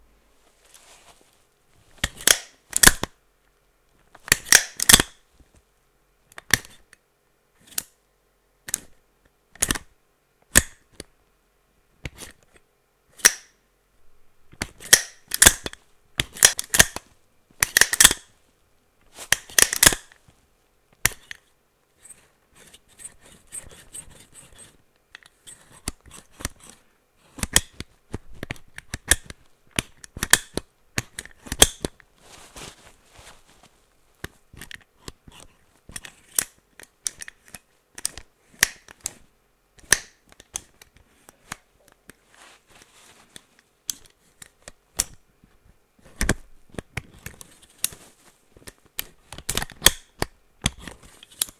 umbrella clicks and clacks
A sound of an umbrella handle expanding and retracting, making clicking sounds.
Great for Foley and sound design.
Recorded with a Tascam TM-80 in a non sound proofed room, without an anti-pop filter.
The umbrella was fairly close from the mic during recording.
The post-processing was minor, just an EQ to cut out some of the superfluous low frequencies.
You can mention me if you like to, or give a link to the project containing this sound, but that's totally up to you.
clack, click, close, closing, expand, expanding, foley, handle, metal, open, opening, retract, retracting, umbrella